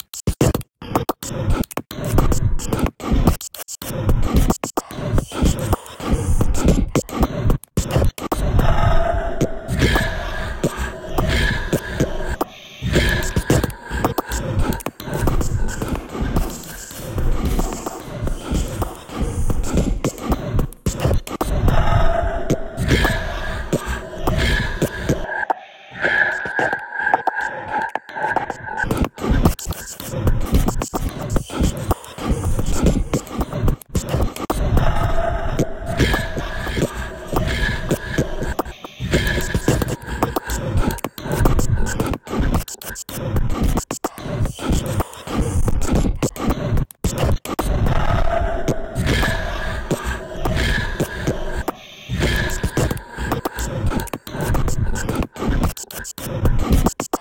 Ha, ha ha mix 2021-05-16--11.28.21
Ermmm....Growling laughter with nonsensical whispering